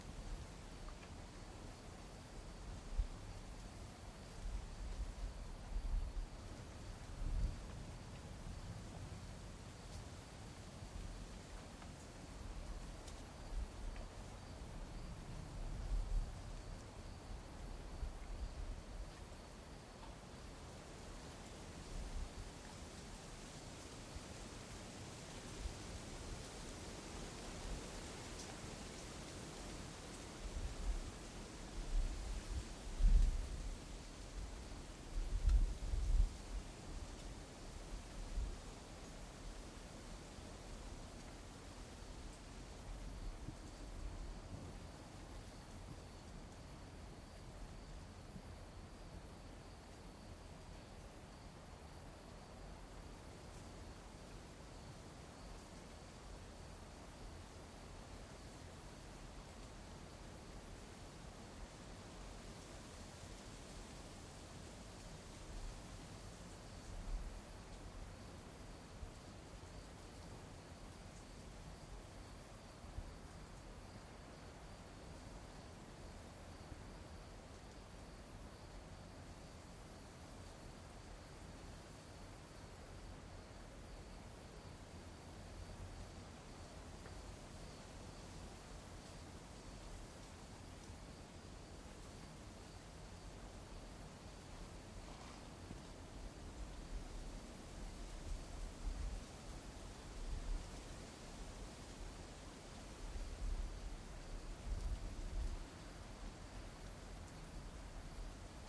Windy Night 02A
Edge of forest on a windy night. Some rustling leaves and some insects.
Rode M3 > Marantz PMD661
ambience, forest, insects, wind, windy-night